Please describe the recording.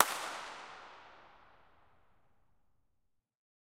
The stereo X/Y mics are Oktava small-diaphragm microphones running into a Sound Devices 702.